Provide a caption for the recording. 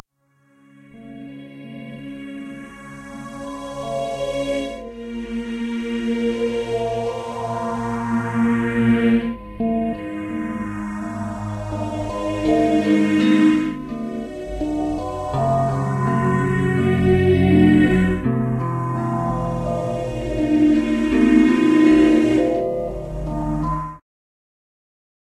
Wo0 and Phased Cello
alternative, cello, electronic, experimental, guitar, instrumental, noise, processed, wo0